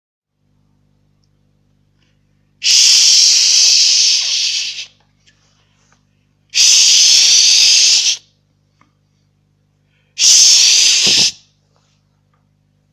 hard shhhhh
horror, shhhh, horror-fx, shh